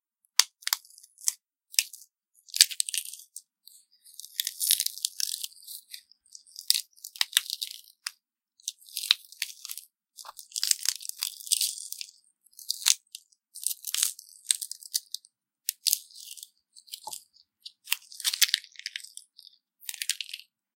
celery crunching
me bending and snapping some celery shoots at my desk. slightly edited to remove background noise
foley, crackle, snap, bone, rip, celery, tear, crack, break, crunch